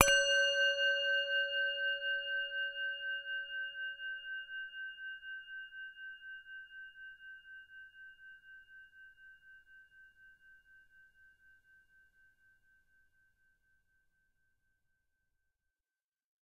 Singing Bowl 23042017 01 [RAW]
Raw and dirty singing bowl sample recorded using a Zoom H5 recorder with it's XY capsule.
Cut in ocenaudio.
Enjoy!
bowl, metallic, metal, singing-bowl, tibetan-singing-bowl, bell, hit, recording, percussion, raw, meditation